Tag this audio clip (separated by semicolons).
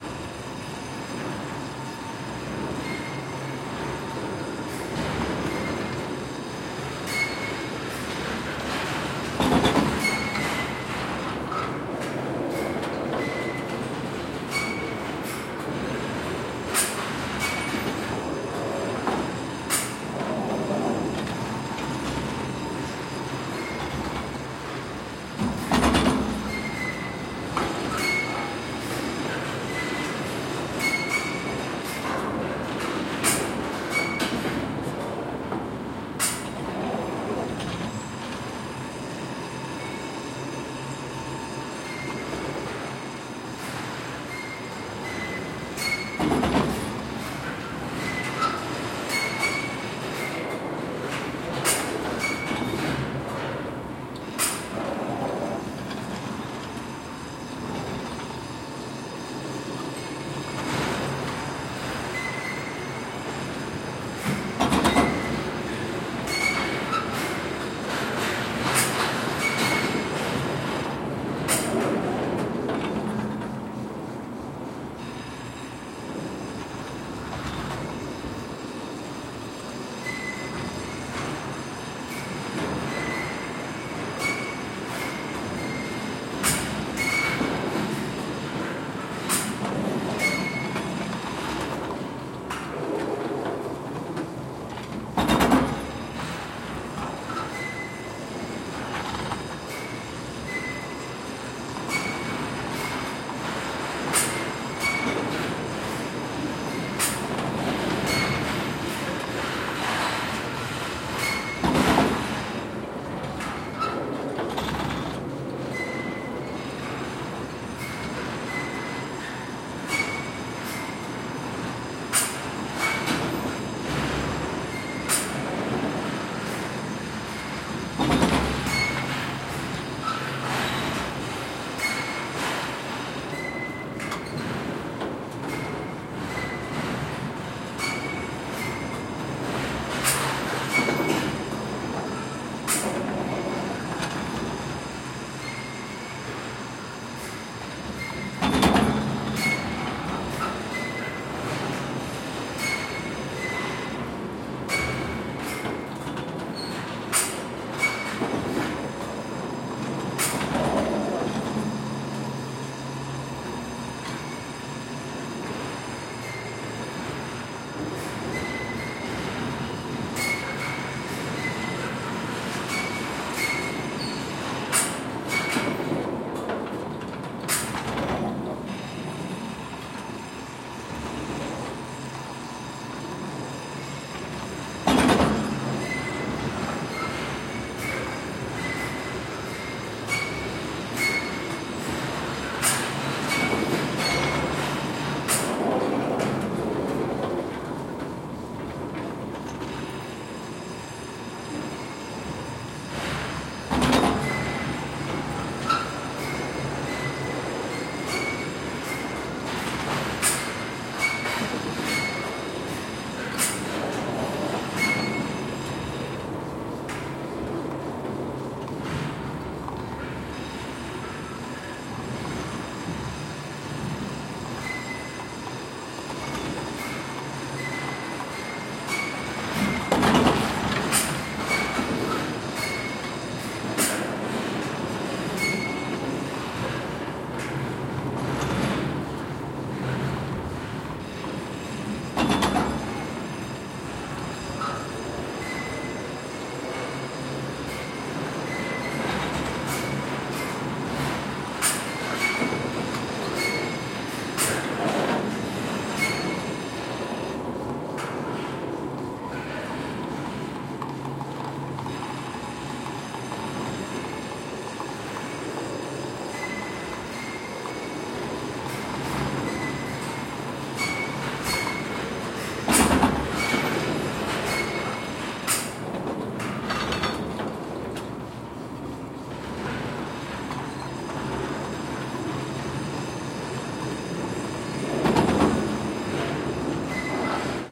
cableway; field-recording; soundscape; atmosphere; city; ambience; industrial; urban